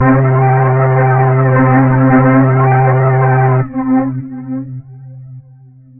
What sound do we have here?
THE REAL VIRUS 12 - FUZZBAZZPHLANGE -C3
This is a fuzzy bass sound with some flanging. All done on my Virus TI. Sequencing done within Cubase 5, audio editing within Wavelab 6.